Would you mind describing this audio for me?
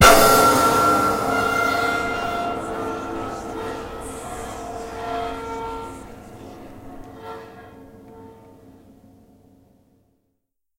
a big crash